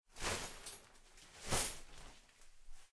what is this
Opening a plastic courtain from the bathroom
Plastic, Courtain